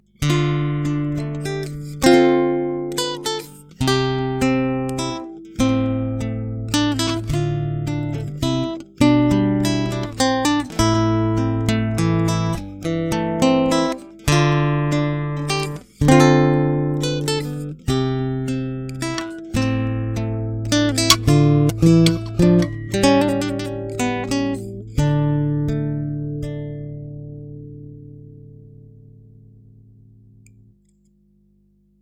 fragment of the wellknown traditional played (poorly) by yours truly on a guitar with nylon strings
guitar, nylon, strings